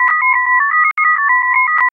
Sound generate with audacity.
- generate whistle dent de scie
- pitch -41
- repeat